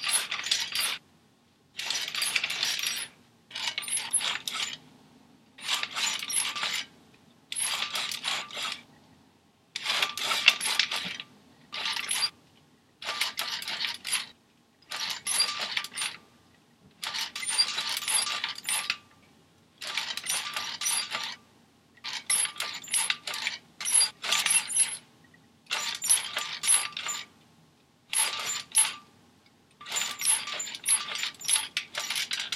A bunch of squeaky metal sounds I made with a metal cart to accompany swinging objects in a short animation project.
Recorded in Audacity using the stock mic in a 2010 MacBook Pro.